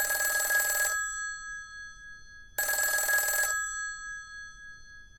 Old style phone ringer
Vintage style telephone ring recorded with a Tascam DR-40 and condenser mics.
phone, ringer, telephone, vintage